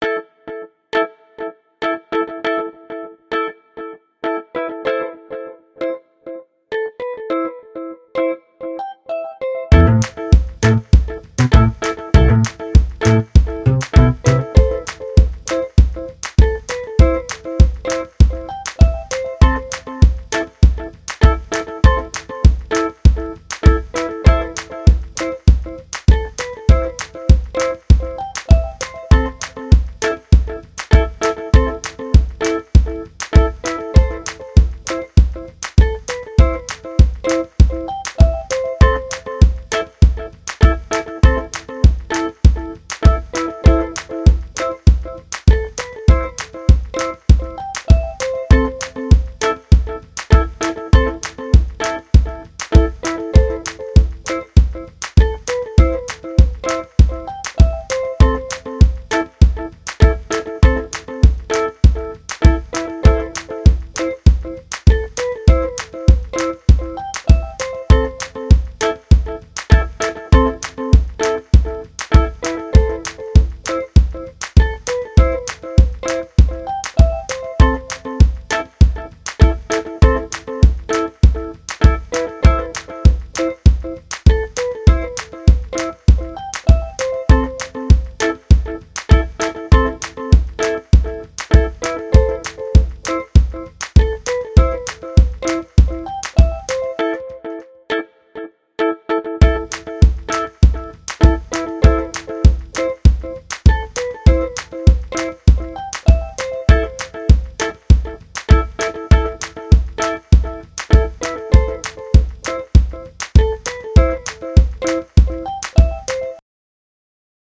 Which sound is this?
Stop drugs music
This music was created to help people suffering from drug addiction
atmosphere, background, banana, hiphop, instrumental, music, sample, sound, soundscape, stereo